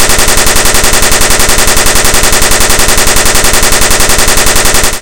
Assault Rifle Shooting
Action, AK-47, Assault-Rifle, Battle-Field, Fire-Fight, Gun, Gunshots, Machine-Gun, Modern, Modern-Warfare, Realistic, Rifle, Shooting, Video-Game, War, Warfare